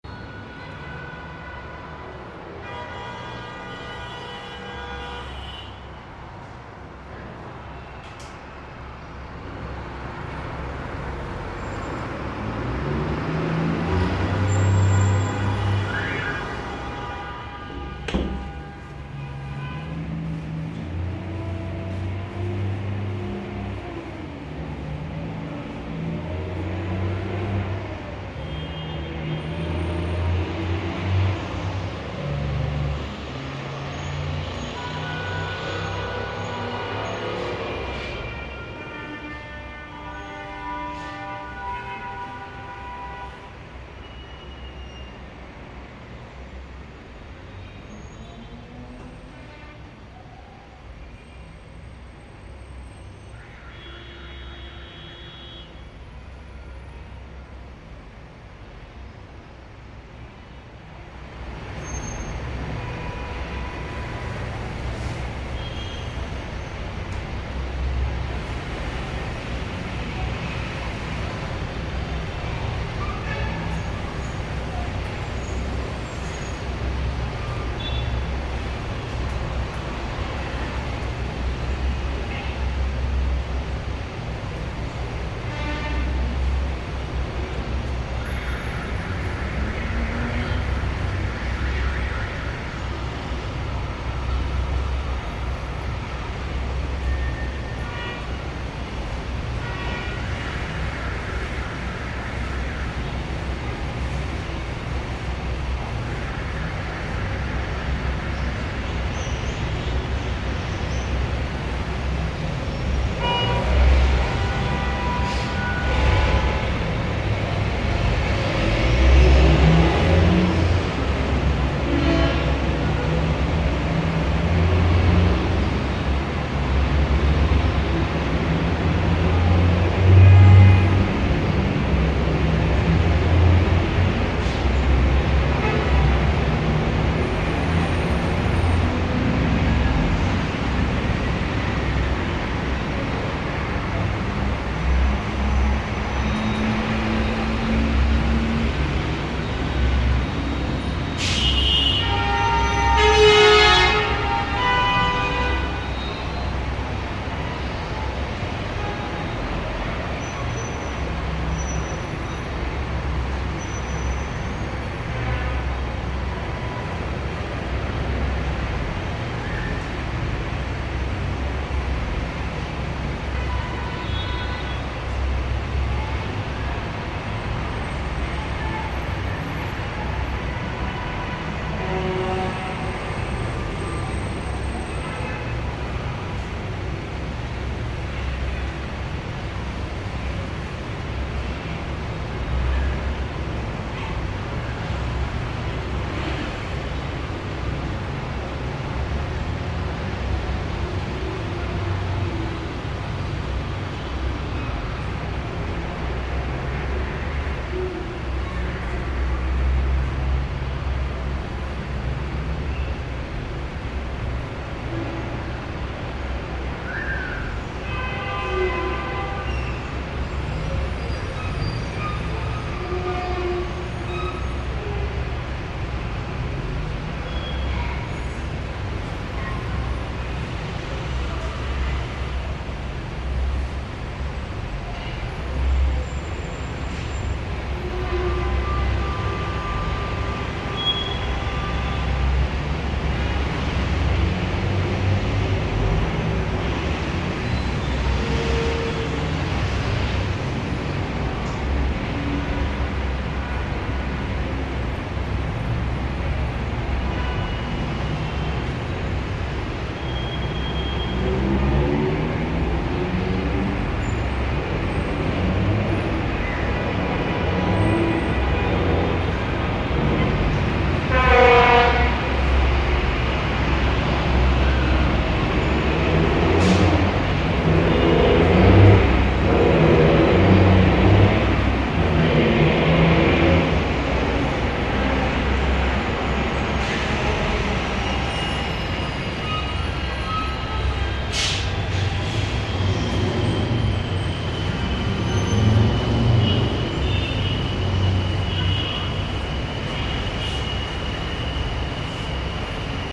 Calle trafico
sonidos de muchos coches y claxons. sounds of several cars and horns
big, Calle, con, fico, grande, hour, rush, street, tr